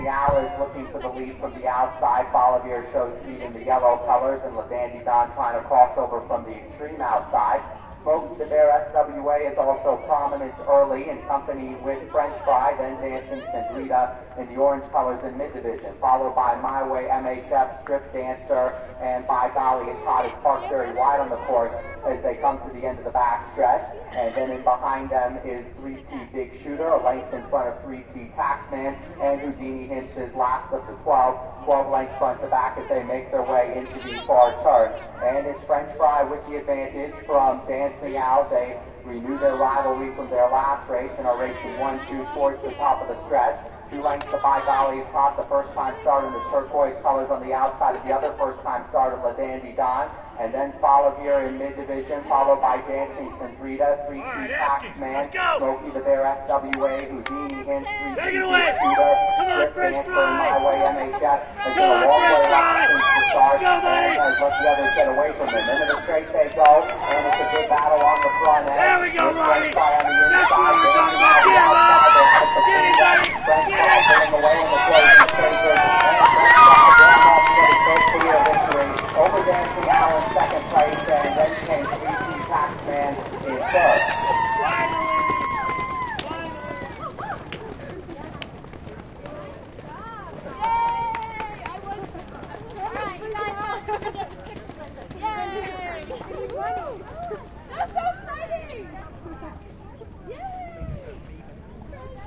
Horse race French Fry wins
This is a recording of a race at Arapahoe Park in Colorado. The crowd was really rooting for a horse named French Fry.
announcer, horse, horse-race, crowd, race